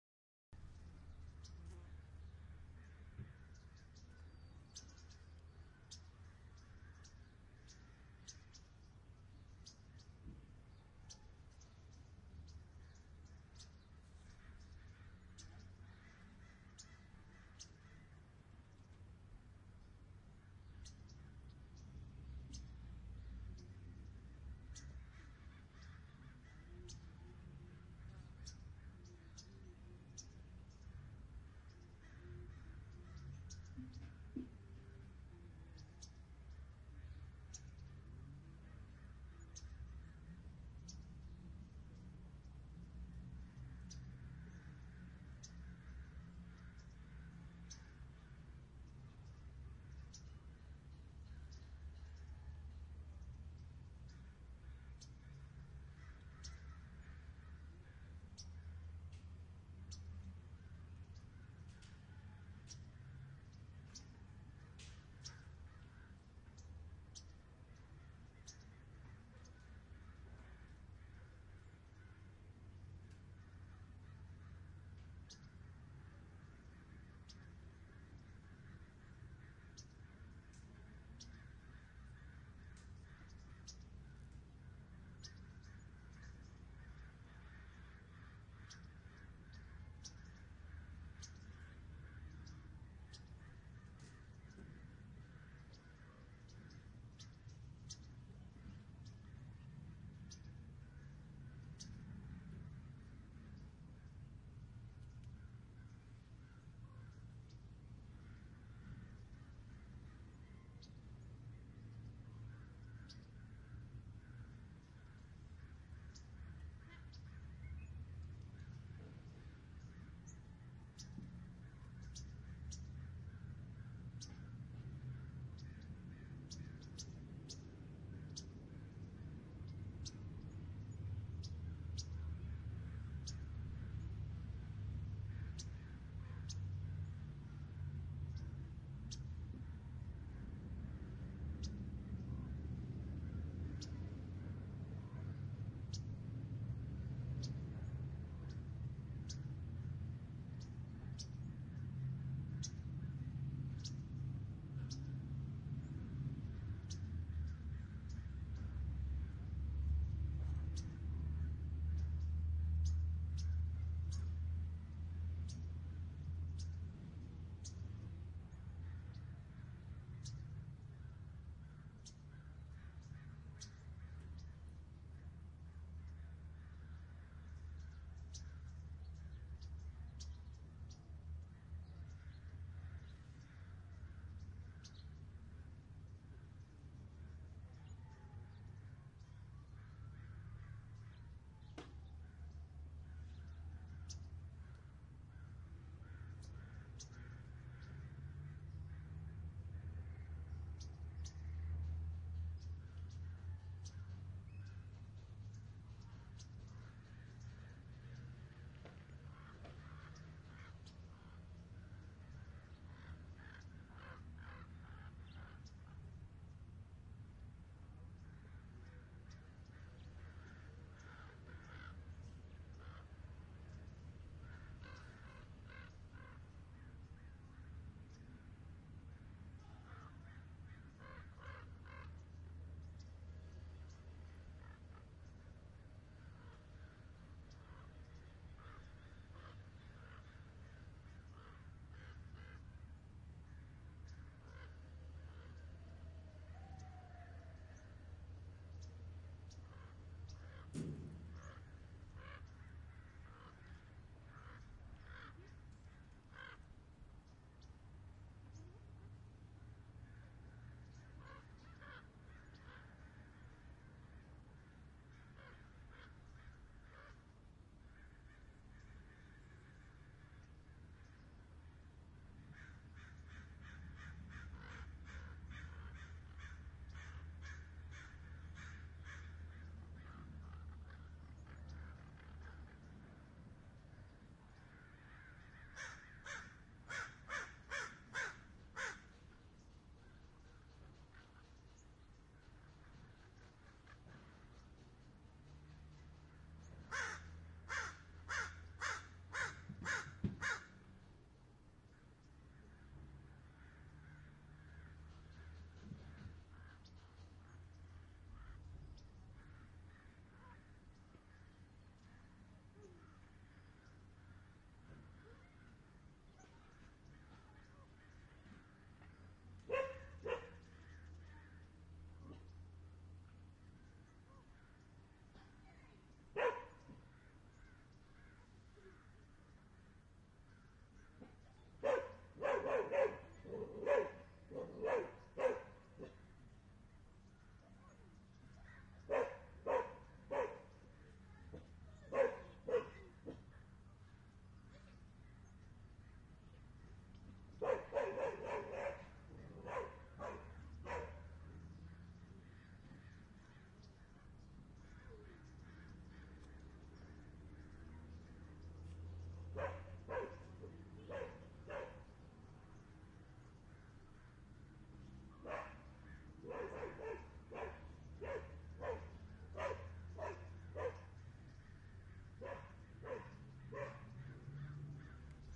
Recorded with a Cantar X, Neumann 191, a quiet XY atmo about 20 miles over the US border into Mexico.